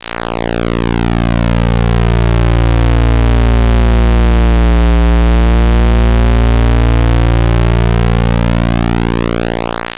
squaresweep1-labchirp
A low-pitched effect that sounds like a single square wave channel performing a PWM cycle. This was actually created by using two operators in LabChirp: Operator 1 is set to "Saw Up" as its waveform while Operator 2 is set to "Saw Down". Because the two opposite-phased waveforms were intersecting, the sound cancels out into silence. This was resolved by modifying the frequency setting to one of the operators.
Created using LabChirp, a program that simulates a 6-operator additive synthesis technology.